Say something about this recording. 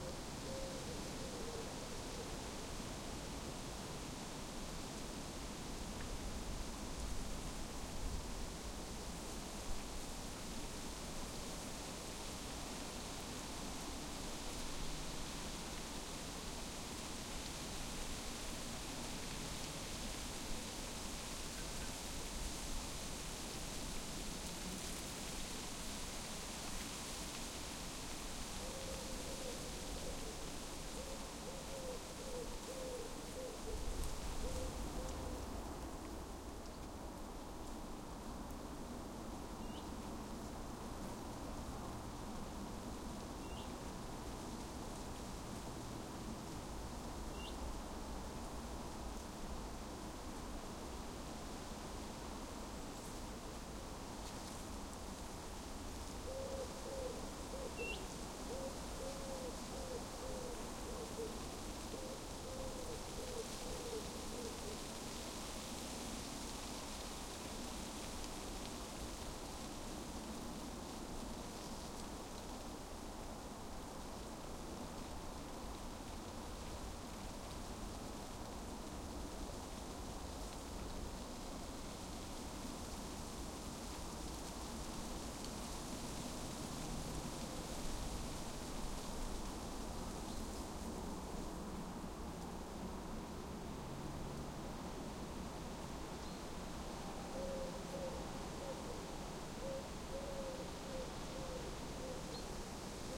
forest ambience steady breeze summer sweden leaves light wind distant highway loop m10
Recorded in a swedish forest on a windy day using Sony PCM m10.